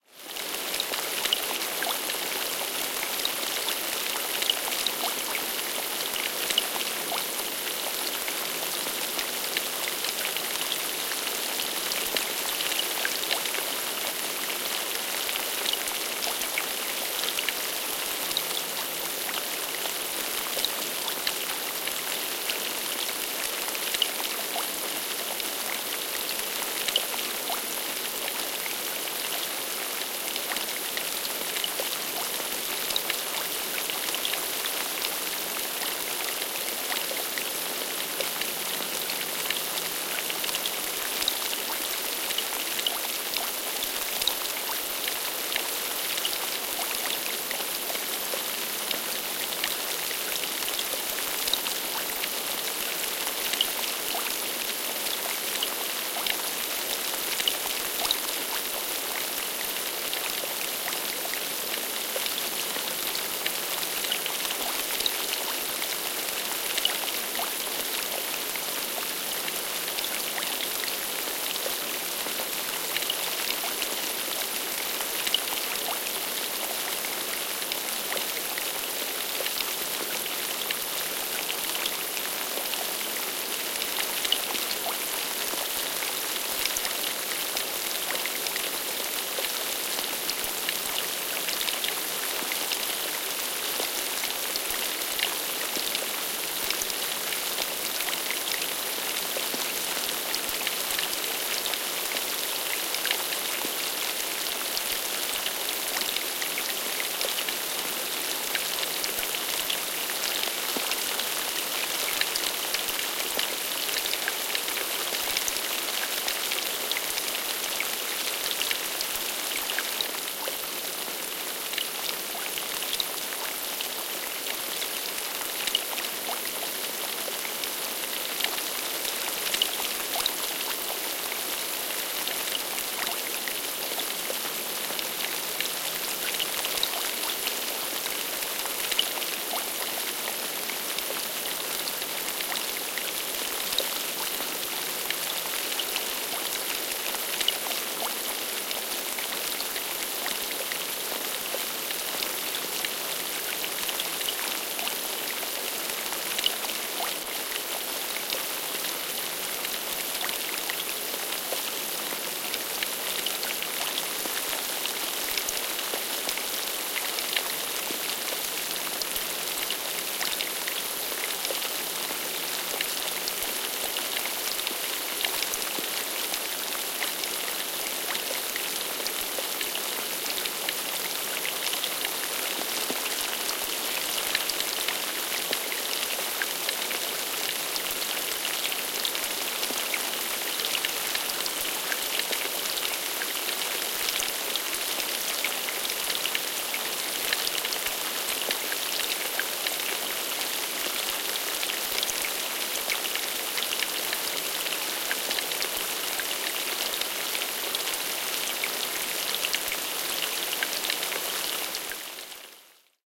Kevyt sade veden pintaan / Light rain on surface of a lake, water
Rauhallista sadetta järven pintaan.
Paikka/Place: Suomi / Finland / Eno
Aika/Date: 17.07.1980
Field-Recording, Finland, Finnish-Broadcasting-Company, Luonto, Nature, Rain, Sade, Soundfx, Suomi, Tehosteet, Vesi, Water, Weather, Yle, Yleisradio